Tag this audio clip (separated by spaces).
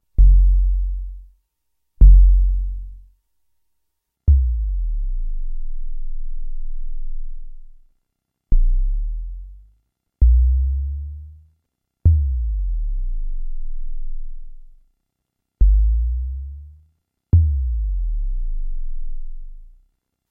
korg analog mono 808 poly